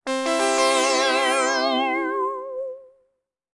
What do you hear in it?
lyckad bana v2
2, fanafare, polysix